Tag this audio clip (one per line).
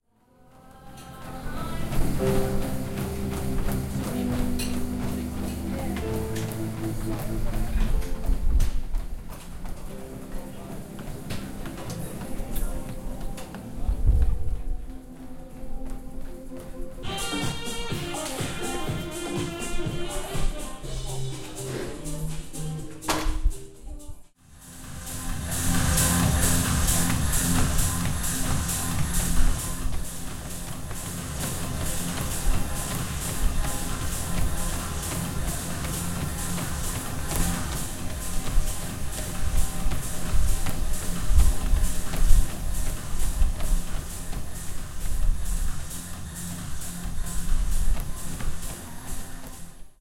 equipment
handhold
indoor
life
sounds